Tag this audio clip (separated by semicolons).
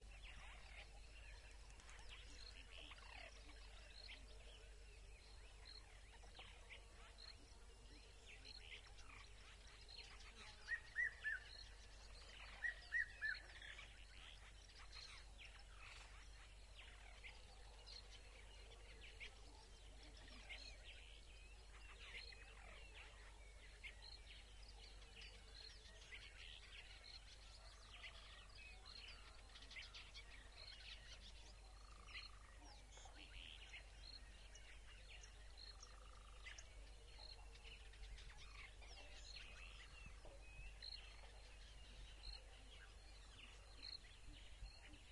birds; morning; nature